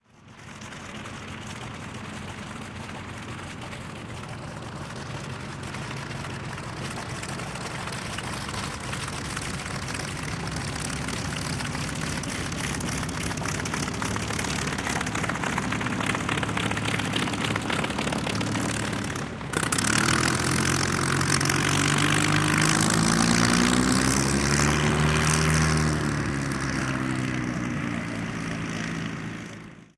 Fw-190 and Bf-109 Taxiing
This is the sound of a Focke-Wulf 190A-5 taxiing by followed by a Bf-109E-3 Emil. Both with all original parts.
Axis, Vintage, Luftwaffe, Plane, Taxiing, Engine, airshow, WWII, Warbird, Bf-109, Airplane, Fighter, Daimler-Benz, Aircraft, BMW, Fw-190